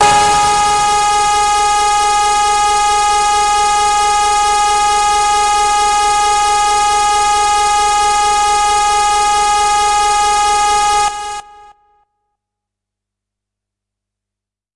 Harsh Lead - G#3
This is a sample from my Q Rack hardware synth. It is part of the "Q multi 010: Harsh Lead" sample pack. The sound is on the key in the name of the file. A hard, harsh lead sound.
electronic, hard, harsh, lead, multi-sample, synth, waldorf